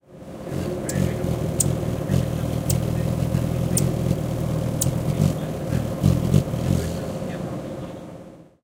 Here's a very short recording of a hummingbird visiting my bird feeder.
ambiance, ambience, bird, birds, buzzing, field-recording, flying, hummingbird, nature, nature-sounds, outdoors, spring